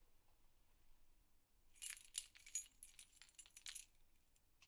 Keys moving
the sound of keys being moved.
keys, key, door, metallic, unlock, lock